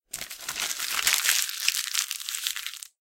Paper Crumpling
Crumpling a piece of paper.
crumple, crumpling, high-quality, page, paper, sheet